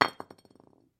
Brick-Drop-Concrete-01
This is the sound of a brick light falling onto a concrete floor. It could be used as an extra layer in a debris sound.
debris, Impact, Concrete